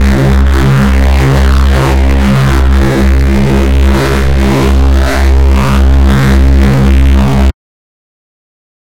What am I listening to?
The title says everything. A distorted reese. But now with flanger and phaser. Its darker and harder than asdfReese.